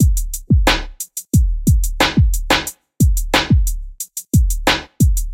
beat2 90BPM
dance, stabs, broadcast, music, loop, drop, chord, move, dancing, background, stereo, club, sample, sound, rap, part, interlude, pattern, beat, intro, disco, podcast, radio, pbm, instrumental, trailer, mix, jingle, hip-hop